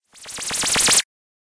WARNING!buildup sine phaser
WARNING!!!LOUD!!! Sine buildup/rise made in Audacity with various effects applied. From a few years ago.
Loud, Phaser, Rise, Sine